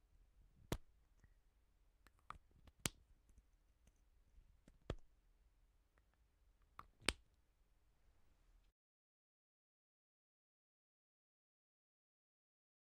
makeup cap
opening a makeup bottle
cosmetics, makeup-cap